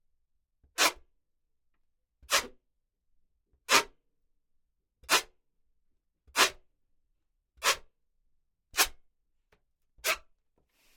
Balloon Creak Short Twist Multiple 2
Recorded as part of a collection of sounds created by manipulating a balloon.
Door, Floor, Close